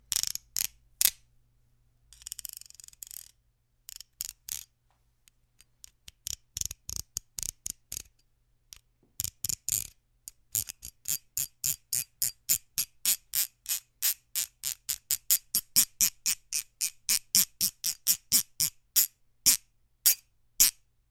Knife-Spoon
Using a serrated knife on the edge of a small spoon, recorded with Neumann TLM103
knife, metal, rattling, saw, serrated, small, spoon